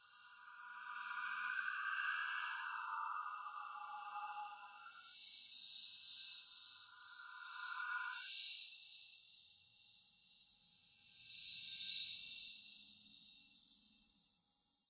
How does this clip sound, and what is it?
creepy tone
Mystery Whispering of ghostly voices.
Strange, Creepy, Spooky, Whisper, Horror